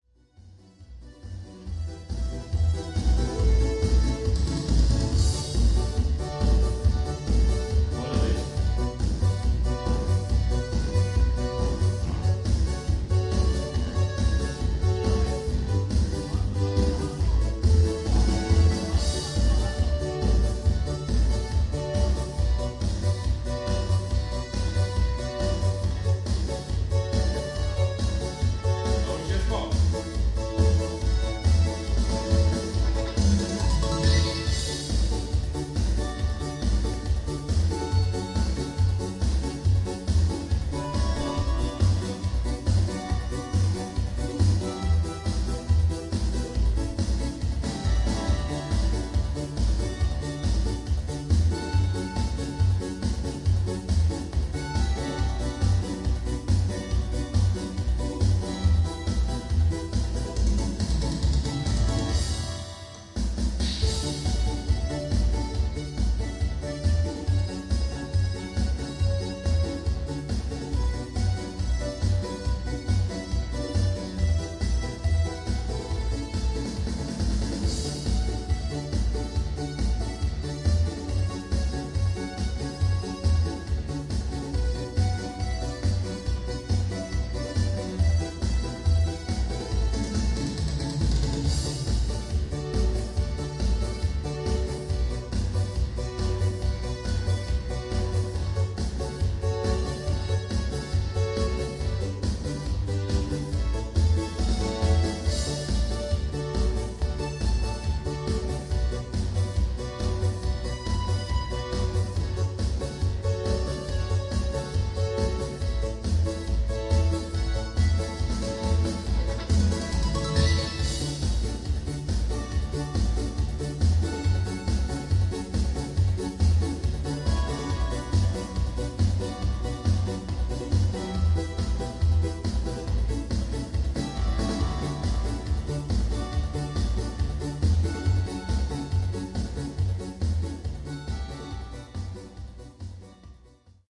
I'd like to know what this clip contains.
13092014 gronów rehearsal
Fieldrecording made during field pilot reseach (Moving modernization
project conducted in the Department of Ethnology and Cultural
Anthropology at Adam Mickiewicz University in Poznan by Agata Stanisz and Waldemar Kuligowski). The pice of music played by an informant. The music player was a grandson who had came to Gronów to play during his granfather birthday. Recordist: Adrianna Siebers. Editor: Agata Stanisz